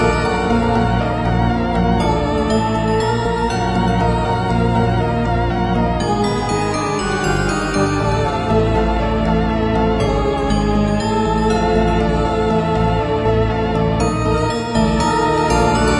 short loops 20 02 2015 3
made in ableton live 9 lite
- vst plugins : Alchemy
- midi instrument ; novation launchkey 49 midi keyboard
you may also alter/reverse/adjust whatever in any editor
please leave the tag intact
gameloop game music loop games dark sound melody tune church